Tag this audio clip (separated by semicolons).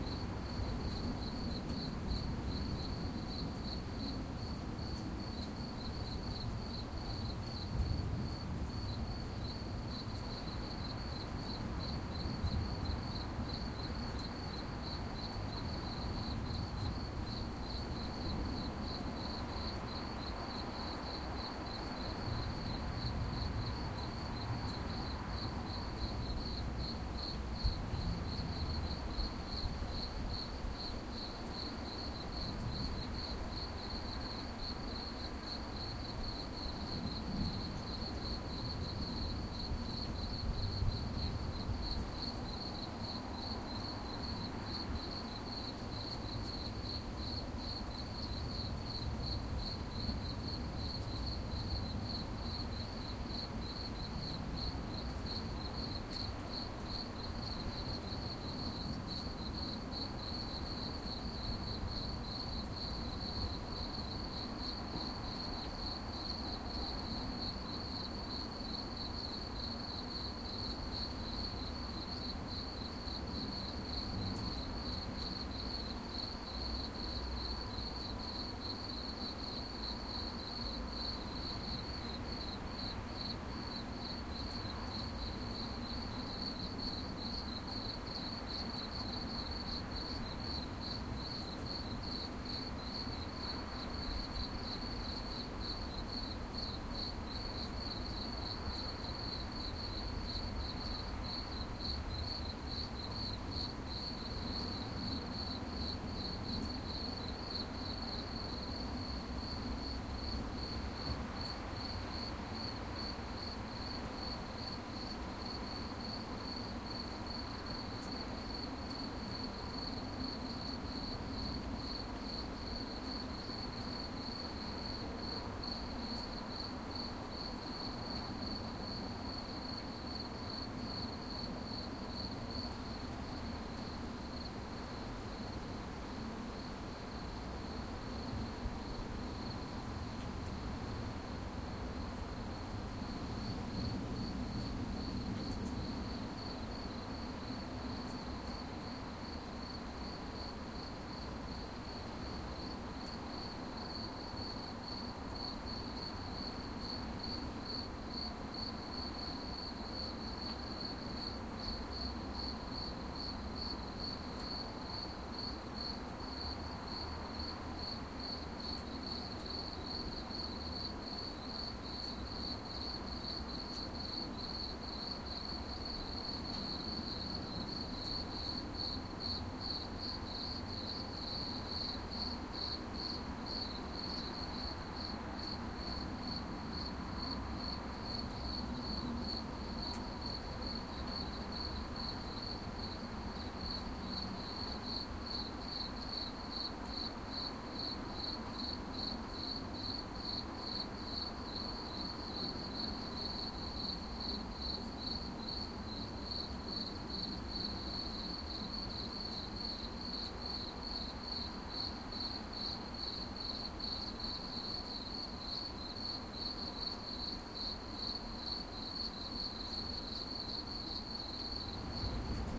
night; noise; general-noise